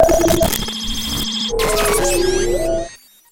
bad telecommunications like sounds.. overloads, chaos, crashes, puting.. the same method used for my "FutuRetroComputing" pack : a few selfmade vsti patches, highly processed with lots of virtual digital gear (transverb, heizenbox, robobear, cyclotron ...) producing some "clash" between analog and digital sounds(part of a pack of 12 samples)
analog, bleep, cartoon, commnication, computer, computing, data, digital, effect, film, funny, future, fx, info, lab, movie, oldschool, retro, sci-fi, scoring, signal, soundeffect, soundesign, soundtrack, space, spaceship, synth, synthesizer, tlc, vintage